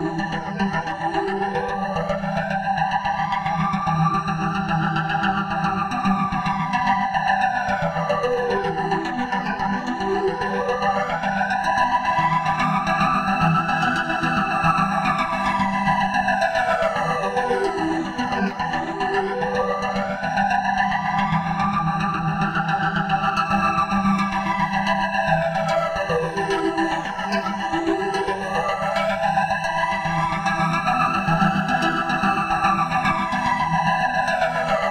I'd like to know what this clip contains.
Arpeggio at 110 BPM. Chord of Em
Created using reFX Nexus VSTi and Ableton Live 8.2.1 and M-Audio's Keystation 32 MIDI controller.